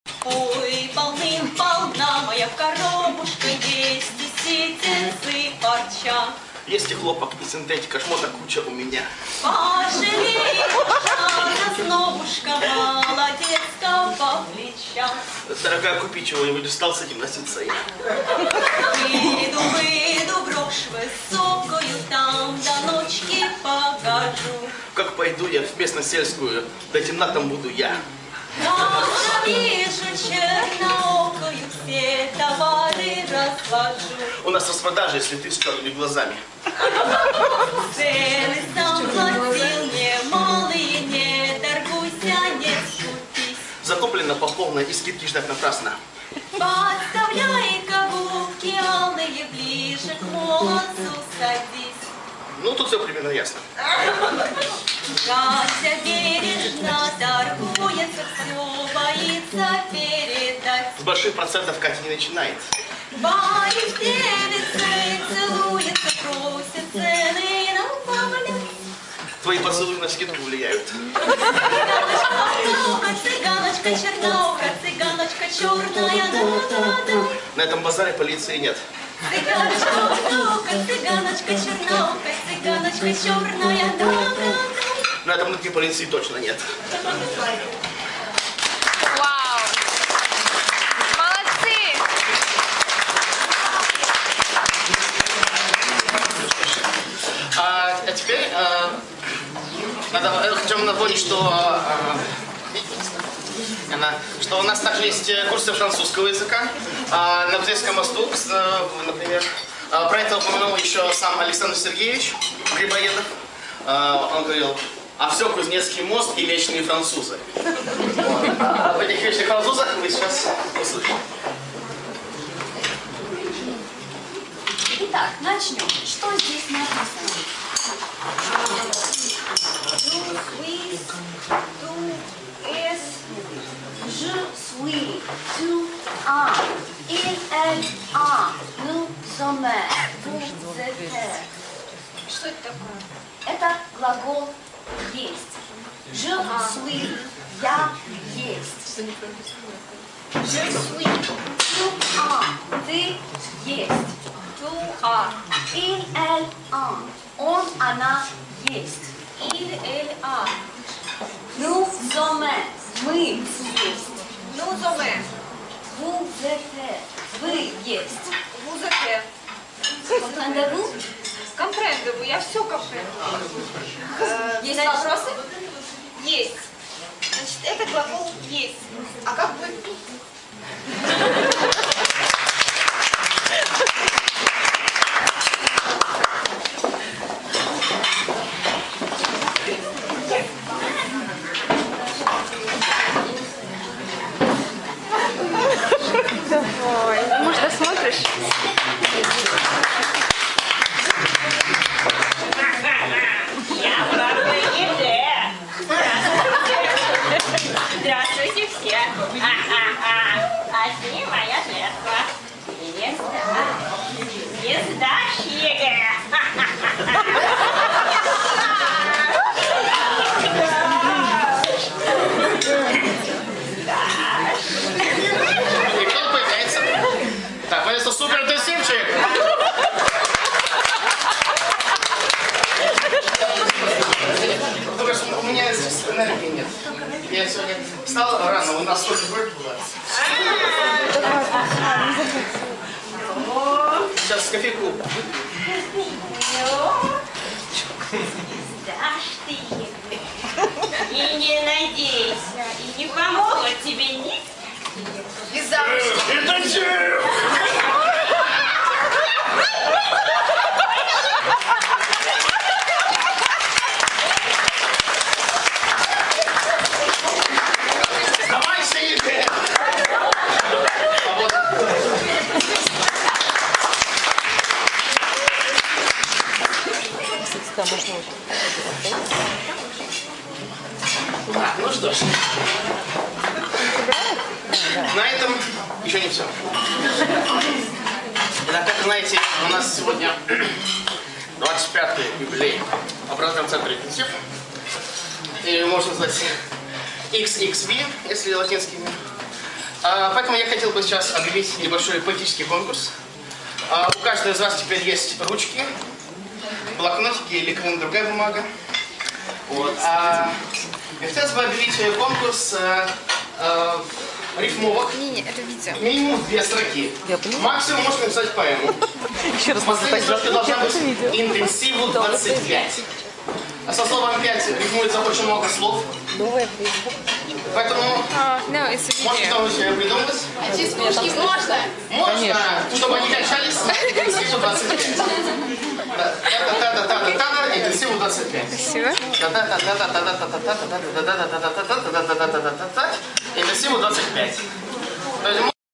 Korobeiniki Tetris song rap
Rap-singing the traditional Russian "Tetris" Korobeiniki song